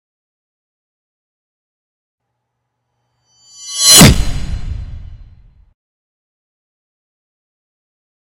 Sword Thud
Woosh-impact I made using the clanging of a metal pole outside my house reversed and added to a kick-impact I made in sylenth. I'll admit its kind of loud so I apologize in advance.